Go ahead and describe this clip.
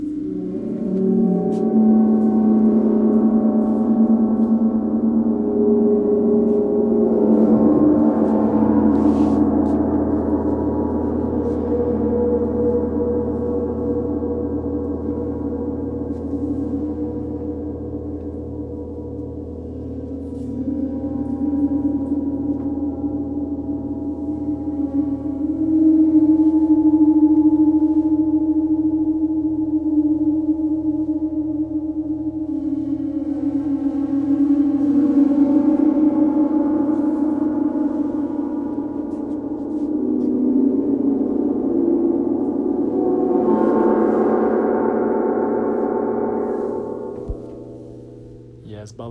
Super ball-long
The use of a super ball in a Big gong. Sounds like whales under water.